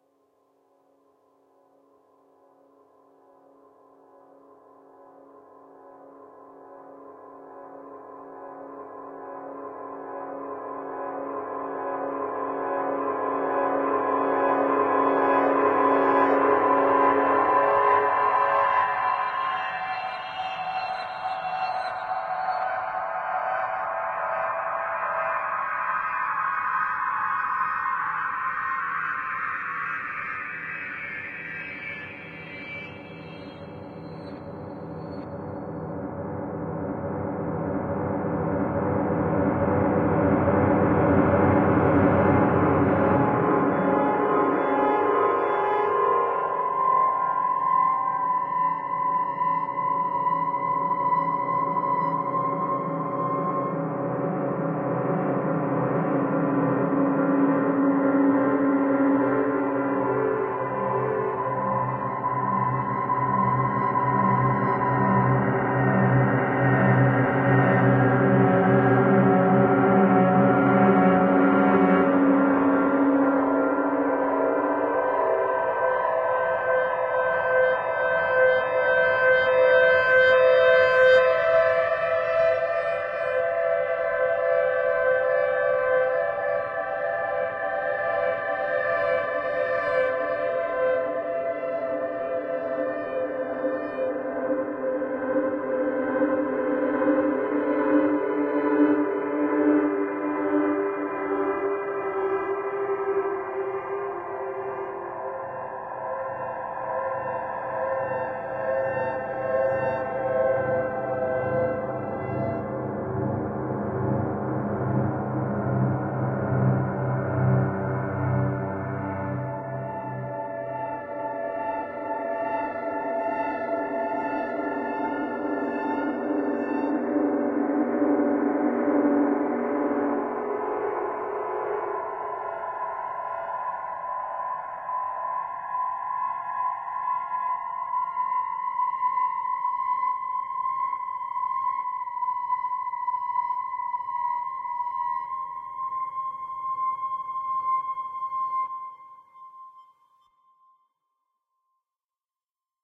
Someones in your house
Experimenting with delay and pitch with piano.
horror, creepy, sound, ambiance, piano, noise